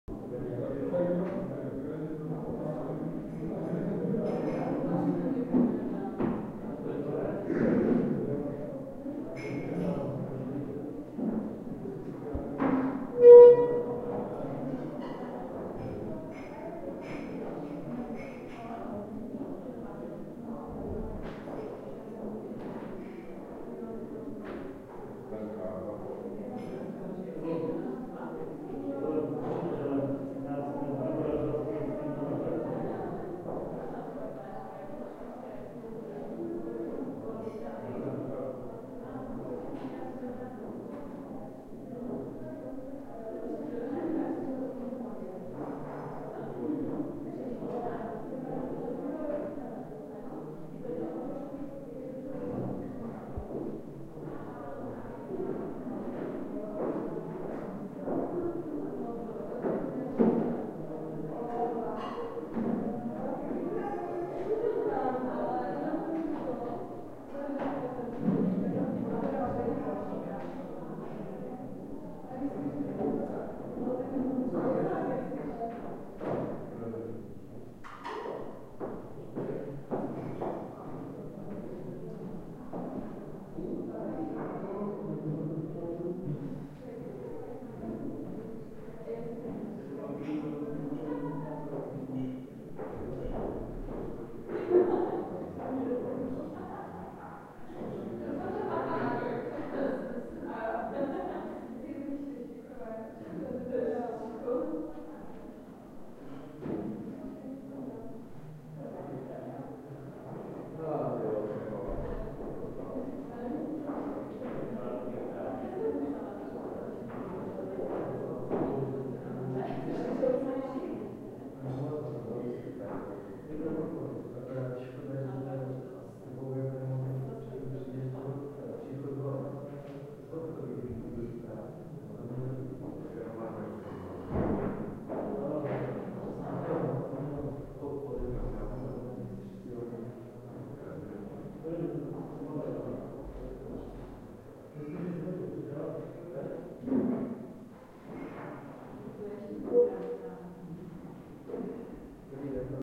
Hallway 1(people chatting in another room, voices)
Hallway ambience with people talking in the background.